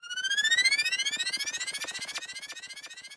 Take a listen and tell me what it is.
power charging
Waveform going up in pitch as it echoes very quickly, as if a weapon was charging up.
Created using Chiptone by clicking the randomize button.
8-bit, arcade, chip, Chiptone, fx, game, pinball, retro, sfx, video-game